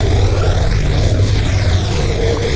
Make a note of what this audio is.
rocket fly
game, games, sounds, video